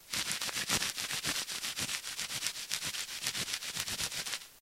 rub beat
a set of samples created using one household item, in this case, bubblewrap. The samples were then used in a composition for the "bram dare 2"
it beats watching telly.........
bubblewrap, dare2